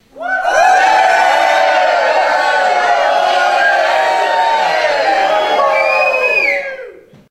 Recorded with Sony HXR-MC50U Camcorder with an audience of about 40.